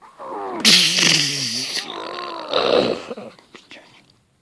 very.weird.sleep.sounds
Four seconds of some very strange sounds I made when sleeping. Recorded by the Android phone alarm clock app "Sleep as Android". It records your sleep to analyze how much sleep you get and how deeply you sleep.
sleep, strange, whipsaw